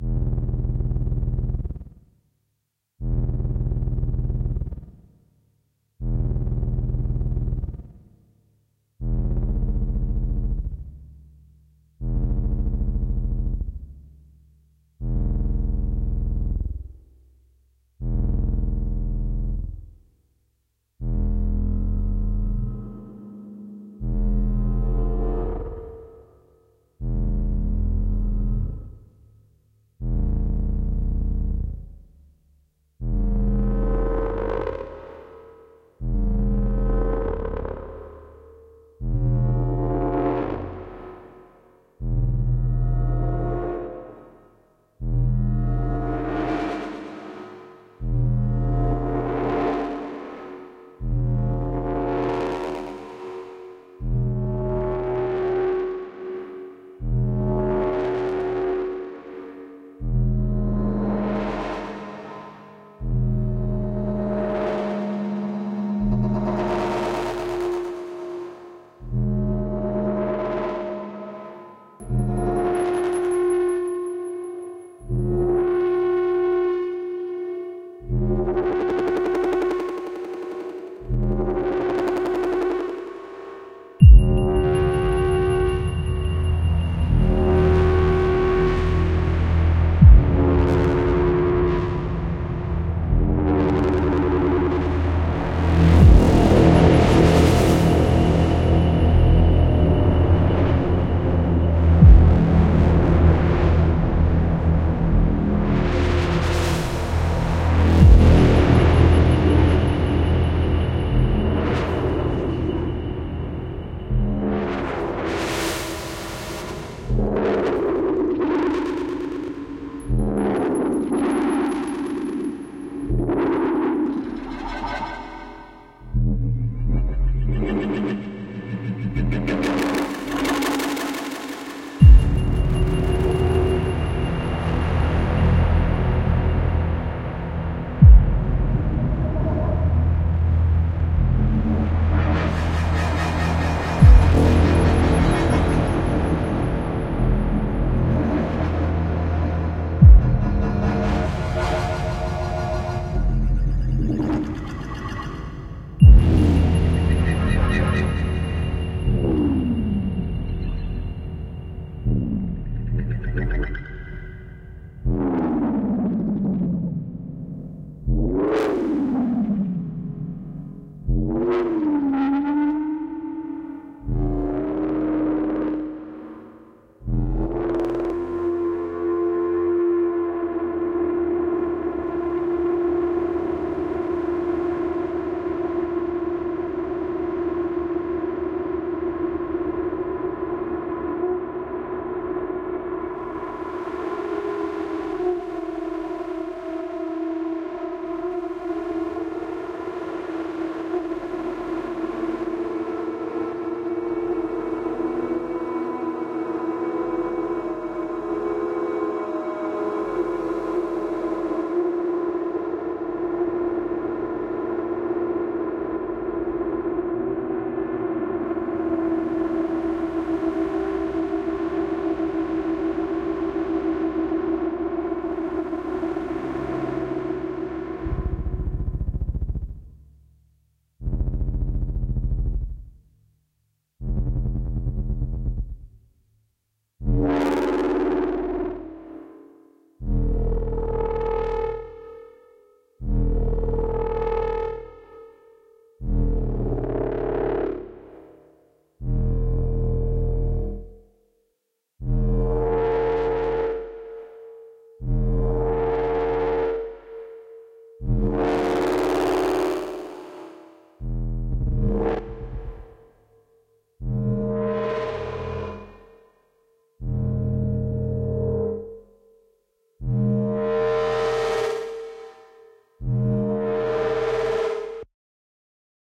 cyberpunk drones
Absolute dread and agony in audio form produced with vcv rack.
part of a series of concept track series called "bad sector"
ambience, ambient, anxious, atmosphere, background, cinematic, creepy, cyberpunk, dark, dread, drone, drones, film, futuristic, game, gritty, horror, music, noise, pulsating, sci-fi, sinister, soundscape, suspense, synth, terrifying, terror, thrill